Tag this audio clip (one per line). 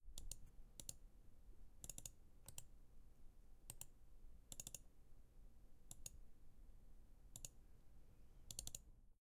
computer
mouse